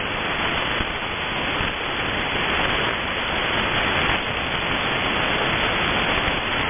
Some AM static.
Recorded from the Twente University online radio receiver.
shortwave, Twente-University, radio-static, short-wave, tuning, static, radio, noise, online-radio-tuner, AM